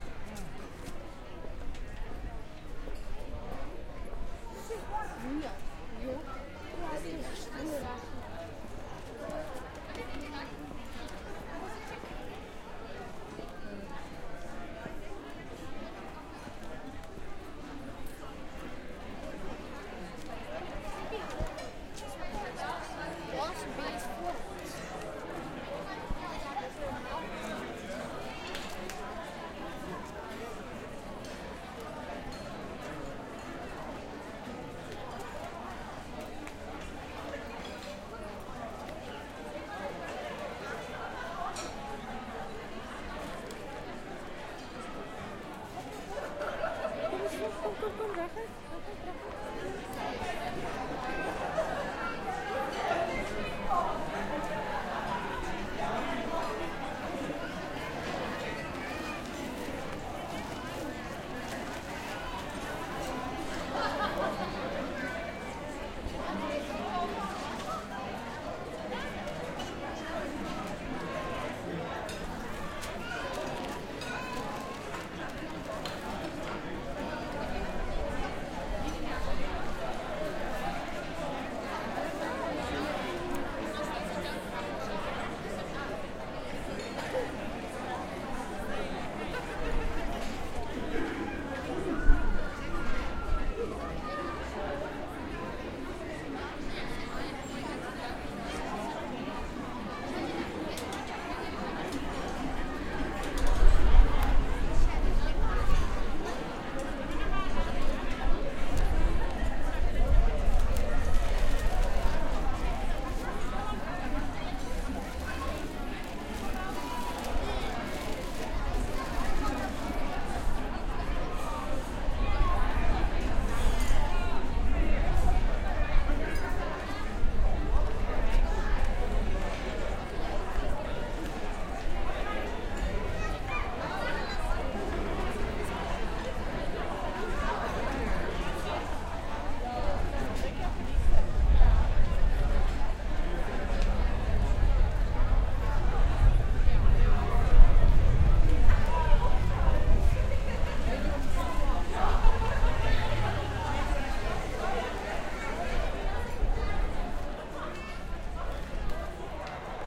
Outside Deck Restaurant
People chatting and eating near The Deck on Irene Farm